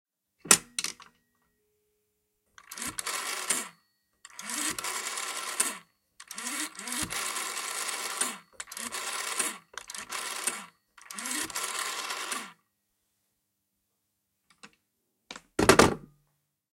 Lifting the receiver on an old bakelite phone, dialing a number and disconnecting. This phone is an Ericsson RIJEN, dated Oct. 1965.